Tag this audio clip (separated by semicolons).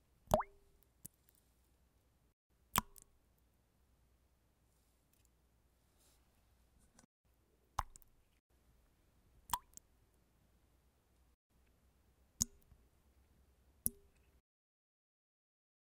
close-up; drop; drops; gota; water